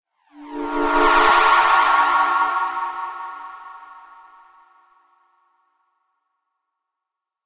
Magic sound 01
Made this in caustic on a phone. Two sounds, one warping effect sweep another fm synth chord sweep.
يرجى مثل ومشاركة
black, effect, evil, game, game-sound, magic, rpg, sorcery, spell, transition, wand, witch, wizard